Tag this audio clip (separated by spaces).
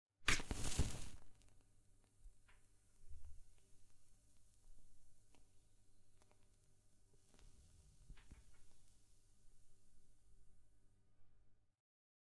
02 a lighting match